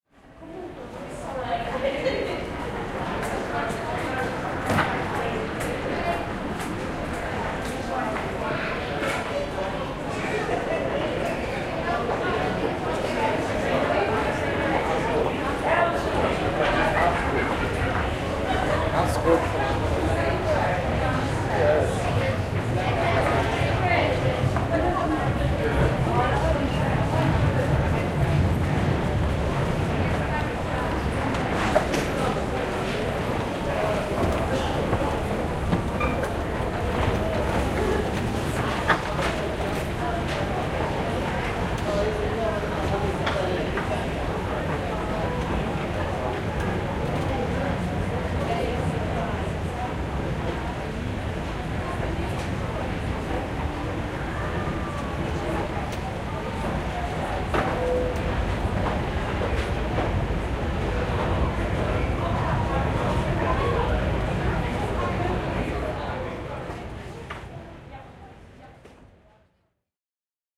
The sounds in the passageways of an underground train station; passenger voices, rumble of distant trains, footsteps, an announcement. Recorded in London Underground at Kings Cross station.